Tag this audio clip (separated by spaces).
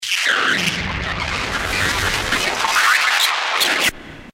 ambient glitch sound-design